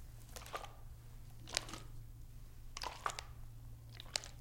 water bottle 1-2
shaking a water bottle
a, bottle, shaking, water